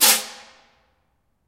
One of a pack of sounds, recorded in an abandoned industrial complex.
Recorded with a Zoom H2.
city, clean, field-recording, high-quality, industrial, metal, metallic, percussion, percussive, urban